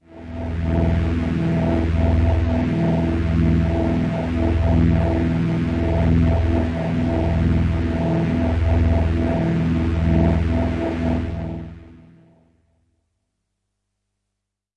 Space Pad - E1
This is a sample from my Q Rack hardware synth. It is part of the "Q multi 012: Spacepad" sample pack. The sound is on the key in the name of the file. A space pad suitable for outer space work or other ambient locations.
ambient, electronic, multi-sample, pad, space, space-pad, synth, waldorf